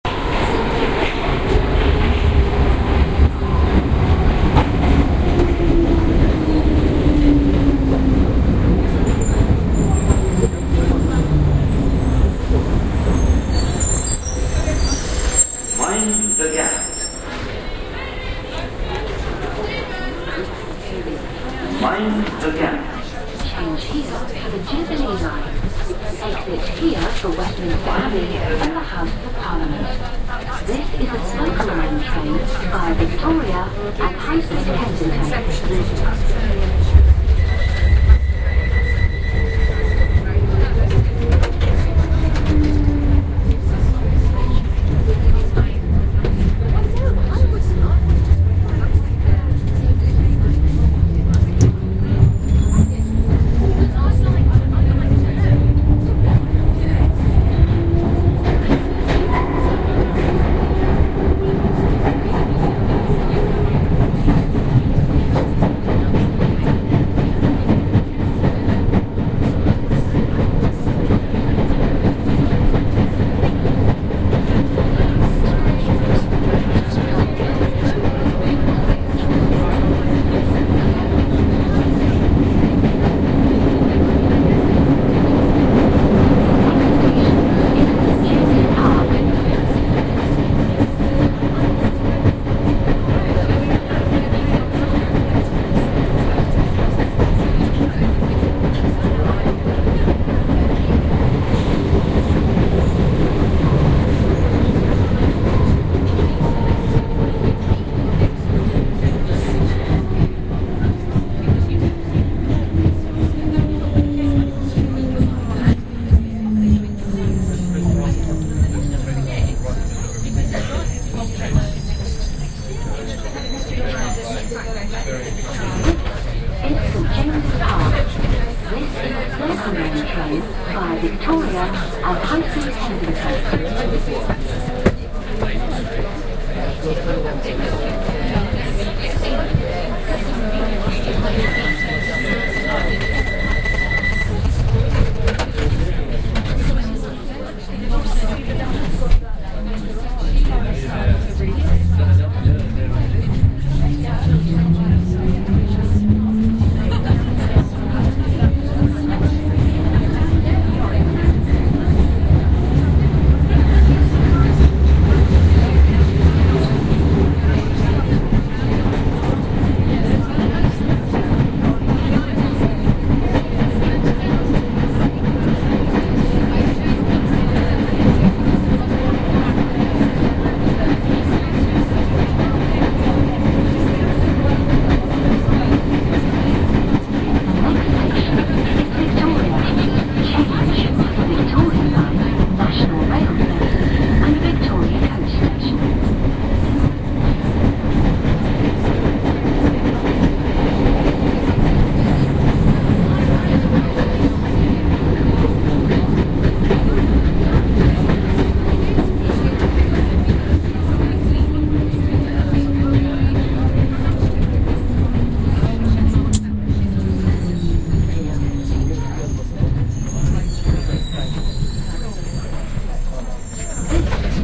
London Underground: Circle line ambience
Sound of the Circle Line on the London Underground 'Tube' system. Recorded with binaural microphones on the train.
metro, england, train, station, subway, binaural, departing, field-recording, rail, transport, underground, london, railway-station, platform, arrival, railway, trains, london-underground, headphones, departure, tube, announcement